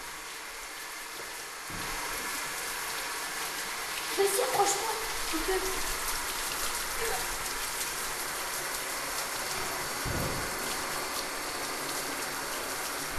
TCR Sonicsnaps HCFR Anouck,Naïg,Florine,Clara-toilettes
pac, TCR